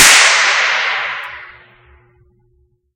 More impulse responses recorded with the DS-40 both direct to hard drive via USB and out in the field and converted and edited in Wavosaur and in Cool Edit 96 for old times sake. Subjects include outdoor quotable court, glass vases, toy reverb microphone, soda cans, parking garage and a toybox all in various versions elite with and without noise reduction and delay effects, fun for the whole convoluted family. Recorded with a cheap party popper